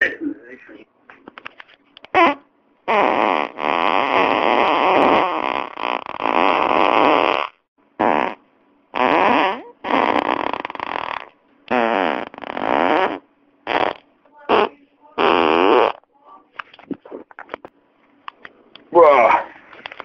thunder fartings in a row
thunder, fart